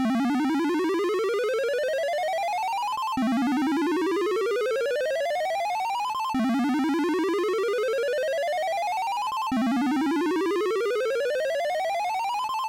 Pixel Sound Effect #2
another sound effect. This is much like the last one, only this time with an arpeggio.
pixel, weird, sound